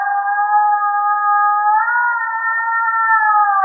mystical singing 2
fantasy, singing, scifi, mystical, processed, siren